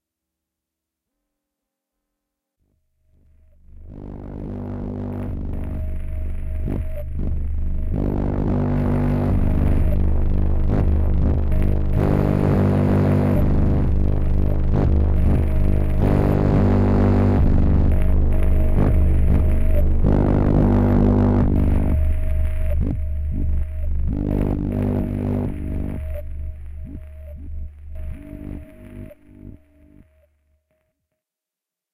Scifi Synth Drone 302
From series of scifi effects and drones recorded live with Arturia Microbrute, Casio SK-1, Roland SP-404 and Boss SP-202. This set is inspired by my scifi story in progress, "The Movers"
arturia, casio, drone, dronesound, microbrute, noise, roland, sk-1, sp-202, sp-404, synthesizer